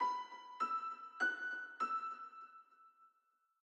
Piano Melody 1
These sounds are samples taken from our 'Music Based on Final Fantasy' album which will be released on 25th April 2017.
Music-Based-on-Final-Fantasy, Piano, Sample, Lead